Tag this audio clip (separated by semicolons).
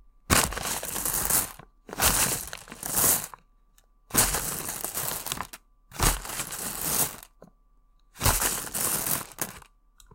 food; grab; skittles